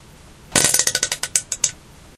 fart poot gas flatulence flatulation explosion noise weird